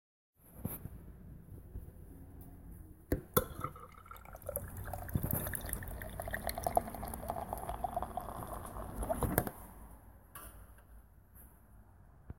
Sound of pint being poured from tap
beer, pint, pour